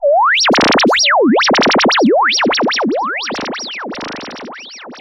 semiq fx 26
soundesign, effect, fx